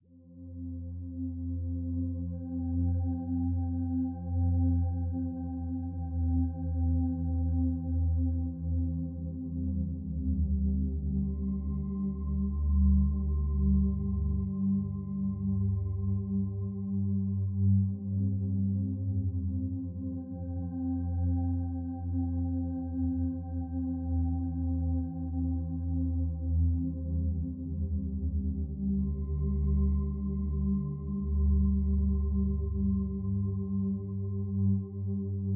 Distant zebra B
ableton, loop, zebra